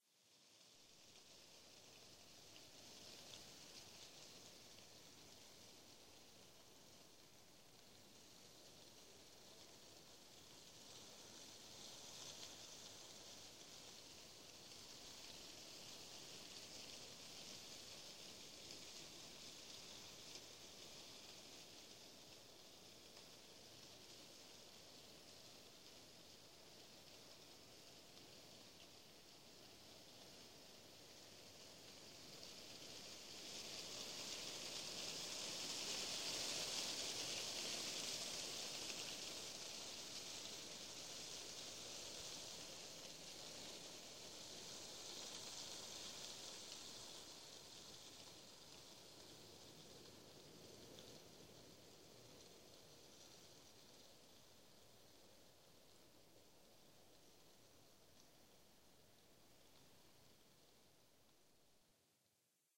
Nightscapes Asplund ett min
Recorded at night, soft wind russeling through ash leaves. 1 Min recording with a soft peaking towards the end as teh wind picks up for a little.